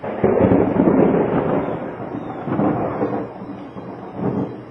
Noise of thunder. The storm is coming.
field-recording, lightning, storm, thunder, thunder-storm, thunderstorm